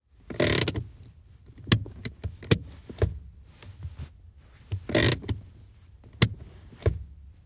05 Renault duster hand Brake
Sound of Renault Duster handbrake inside car
handbrake, renault, duster